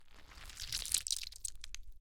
rubber anti stress ball being squished
recorded with Rode NT1a and Sound Devices MixPre6
blood, bones, brain, flesh, foley, goo, gore, gross, horror, horror-effects, mush, slime, splat, squelch, squish, wet, zombie